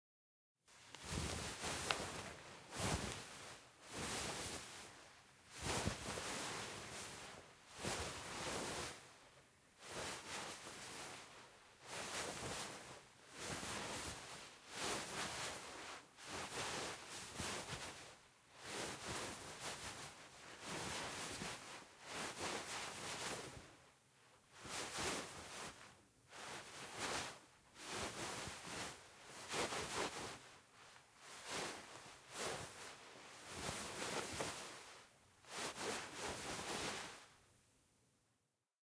fabric/clothes movement (Foley)- sweater
MKH60/M179 (M-S)-> ULN-2.